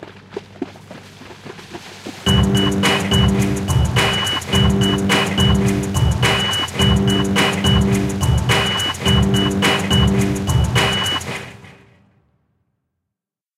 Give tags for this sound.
beep
lawn-mover
splash
kanaalzone
groove
water
sprinkler
metal
Ghent

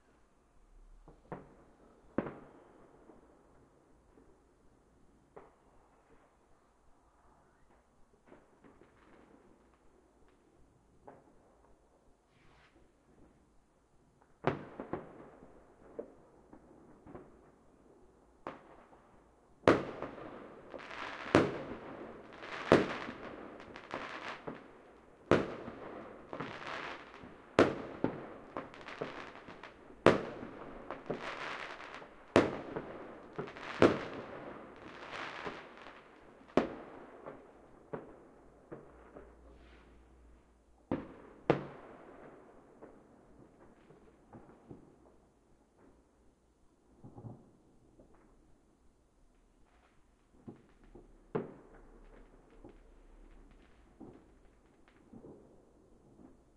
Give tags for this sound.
Firework
year
rocket
new
explosion

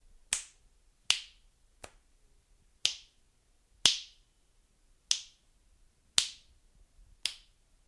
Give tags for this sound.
finger
fingersnap
snapping

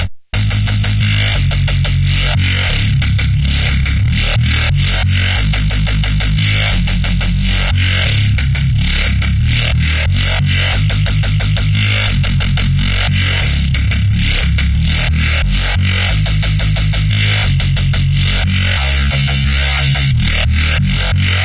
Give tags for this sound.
Beat
Drum
Loop
Vocals
Drums
DrumAndBass
Melodic
Lead
Heavy
Dream
Vocal
Bass
179BPM
Rythem
DnB
Pad
DrumNBass
Fast
dvizion
Synth

